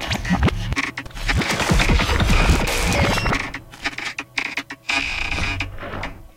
SoMaR BioTeK 97
Travel to the depths of Parallel Worlds to bring you these 100 sounds never heard before...
They will hear sounds of the flight of strange birds if they can be called that, of strangely shaped beings that emitted sounds I do not know where, of echoes coming from, who knows one.
The ship that I take with me is the Sirius Quasimodo Works Station, the fuel to be able to move the ship and transport me is BioTek the Audacity travel recording log Enjoy it; =)
PS: I have to give up the pills they produce a weird effect on me jajajajaja